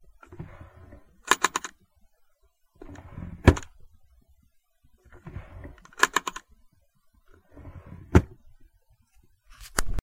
Bedroom Small Nightstand Drawer
A bedroom sound effect. Part of my '101 Sound FX Collection'
bed, bedroom, clock, close, door, drawer, house, household, lamp, noise, ring, slam, tick, window